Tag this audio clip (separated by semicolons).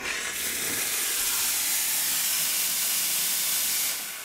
brew; coffee; espresso; machine; nise; noise; vapor